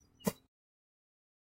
Footstep on grass recorded with Zoom Recorder